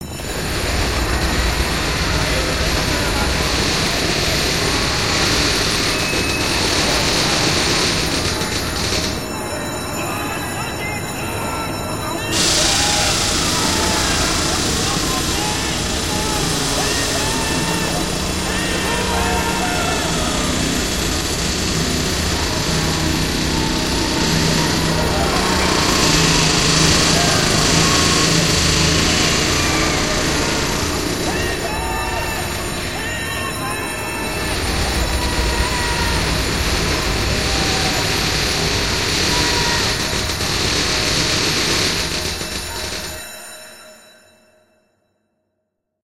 wreck dry
artificial soundscape of the interior of a sinking ocean liner - with screams, alarm bell and crushing metal
shipwreck, impact, sinking, scream, ship, catastrophe, crush, metal, alarm